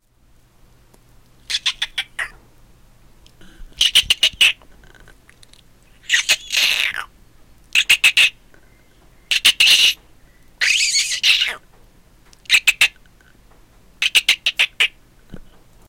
Chipmunk - rodent - rat - squirrel angry or chattering
Sound I couldn't find on here, then realised I've been making it as a kid. so recorded it, and uploaded. not realistic, more in line with cartoony.
animals, chattering, chipmunk, chittering, creature, rodent, small, squirrel